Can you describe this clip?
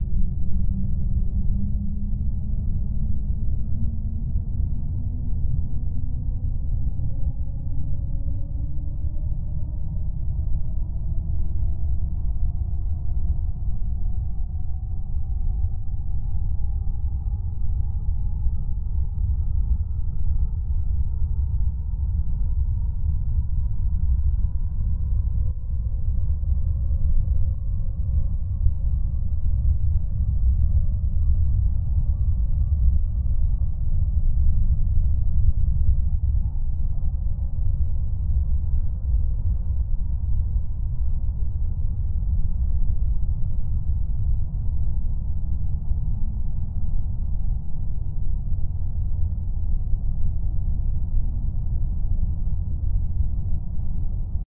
Low deep background
with a low tone, an effect that can be included in a thriller scene or other uses.
bed-tiller, low-deep-background, suspense-scene